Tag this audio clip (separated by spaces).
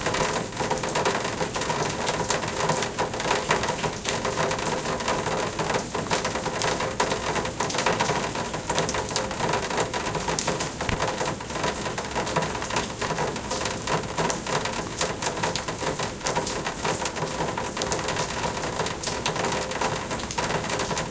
car-hood hollow loud metal pitter-patter rain raindrops water weather wet